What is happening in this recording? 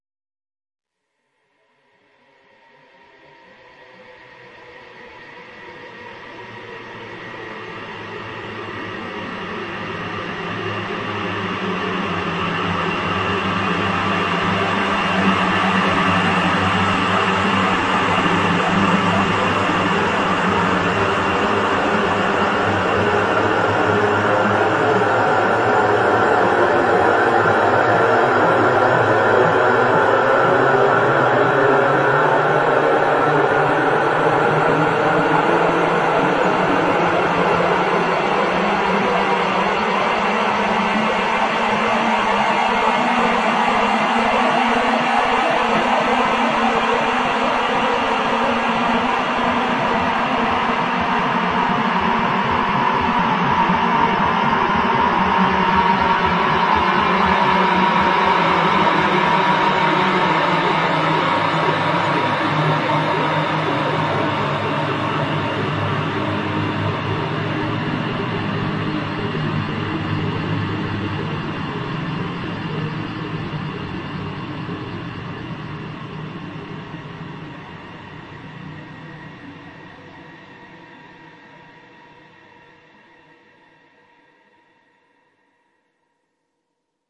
About one and a half minute of beautiful soundescapism created with Etheric Fields v 1.1 from 2MGT. Enjoy!
Electronic, Ambient